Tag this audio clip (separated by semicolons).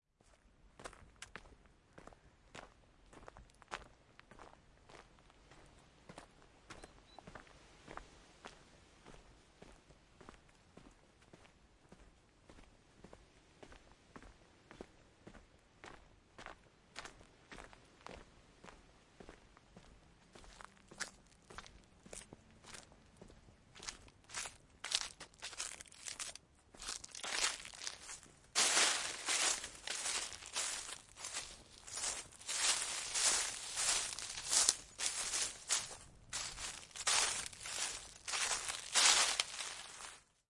footstep; footsteps; gravel; leaflitter; step; steps; walk; walking